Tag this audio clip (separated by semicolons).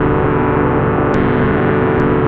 fm; frequency-modulation; glitch; loop; manyvoices; microsound; operator; synthetic; texture